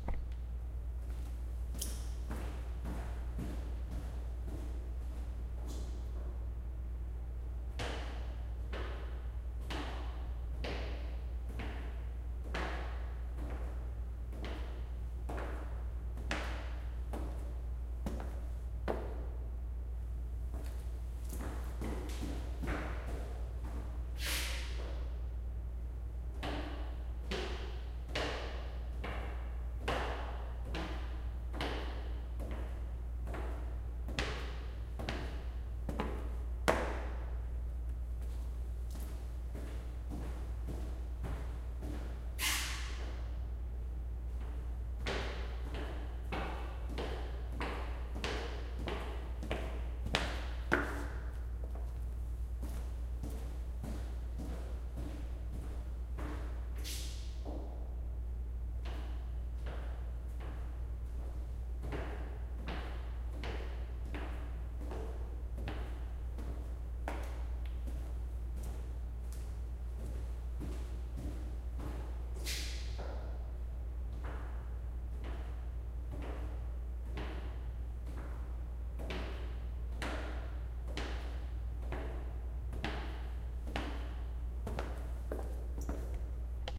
Footsteps on tiled bathroom
Zoom H4n recording of person walking on tiled bathroom floor with dress shoes in a few different speeds.
Originally recorded for the web series "Office Problems".